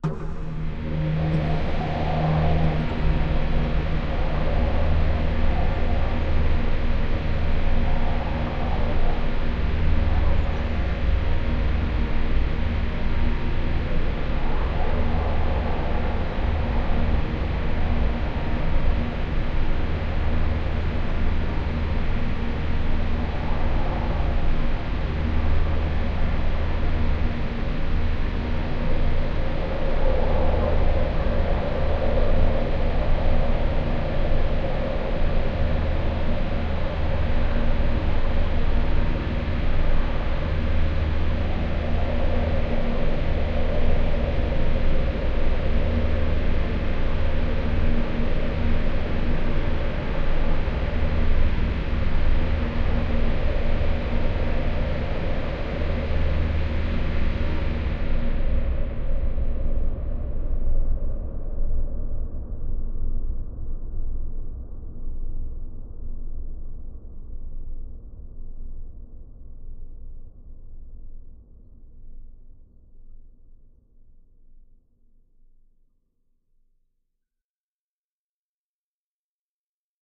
LAYERS 006 - Chrunched Church Organ Drone Pad is an extensive multisample package containing 97 samples covering C0 till C8. The key name is included in the sample name. The sound of Chrunched Church Organ Drone Pad is mainly already in the name: an ambient organ drone sound with some interesting movement and harmonies that can be played as a PAD sound in your favourite sampler. It was created using NI Kontakt 3 as well as some soft synths (Karma Synth) within Cubase and a lot of convolution (Voxengo's Pristine Space is my favourite) and other reverbs as well as NI Spectral Delay.